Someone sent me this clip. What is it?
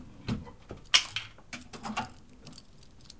did a shotgun shell just hit the floor
It sounds like a shell hit the floor of my room.
ammo, clink, echo, gun, metal, rack, resonance, shell, shotgun